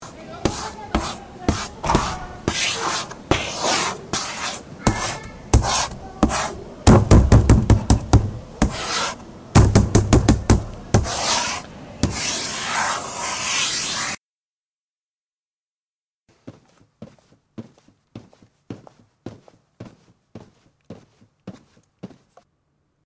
tcr sound scape hcfr marie nora
France, Pac, Soundscapes